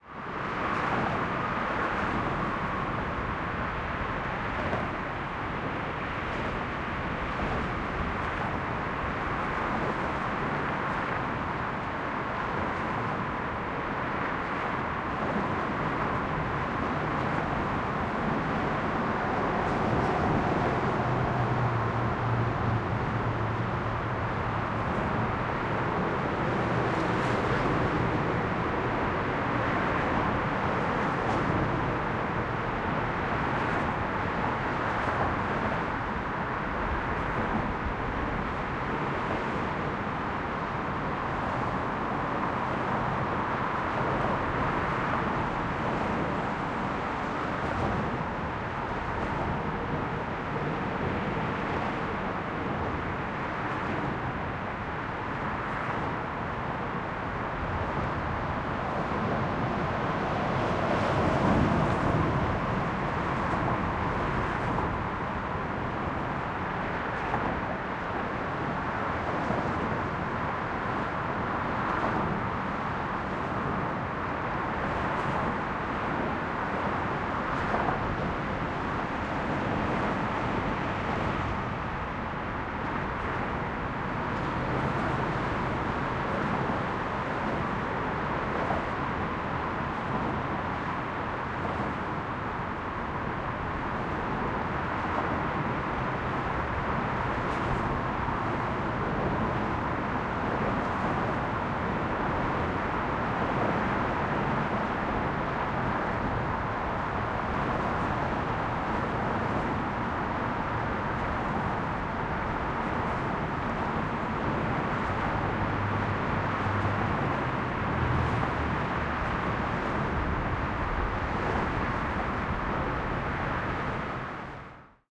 Highway/Truss/Bridge: cars passing overhead
A busy highway recording from below the road itself at the onset of a truss. Lots of rumble, white noise, reverb from the boundaries of the ground and the steel and concrete above.
rumble, cars, steel, highway, passing, reverb, truss, white, exterior, urban, noise, roadway, bridge, busy, concrete